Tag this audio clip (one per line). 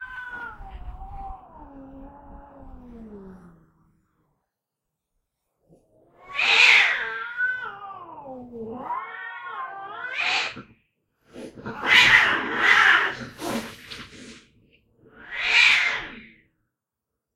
cats
field-recording
hiss